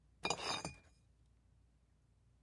Box Of Bottles Take Out FF246
Box of glass bottles being moved, glass on glass tinging, sliding glass, medium pitch, one slide.